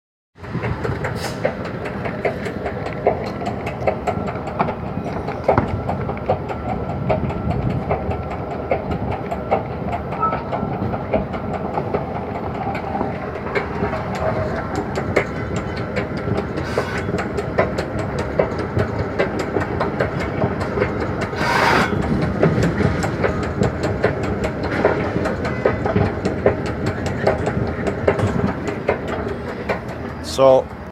outdoor escalator BART station 24 + Mission streets